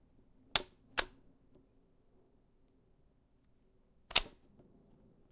when plugging and unplugging a chord into a power source.